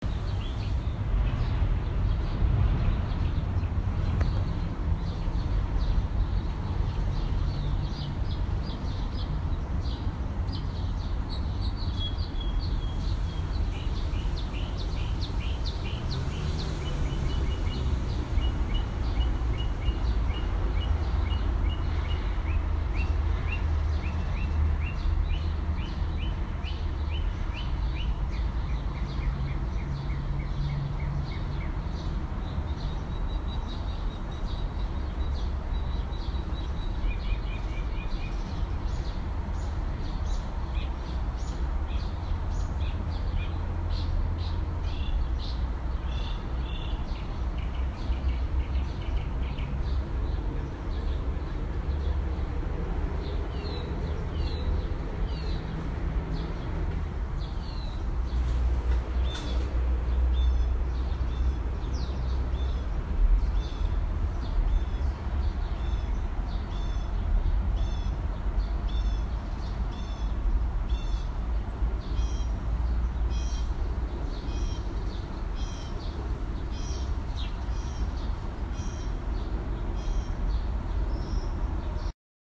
Residential St Columbia Waterfront District.1.2
Recording of Columbia St in Brooklyn. Bus, birds, cars.
ambiance, bus, city, field-recording, light, traffic